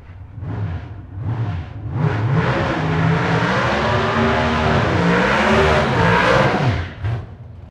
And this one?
Pro Stock Burn Out 1 - Santa Pod (B)
Recorded using a Sony PCM-D50 at Santa Pod raceway in the UK.
Engine, Race, Motor-Racing, Dragster, Drag-Racing